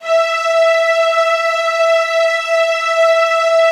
11-synSTRINGS90s-¬SW
synth string ensemble multisample in 4ths made on reason (2.5)
e4, multisample, strings, synth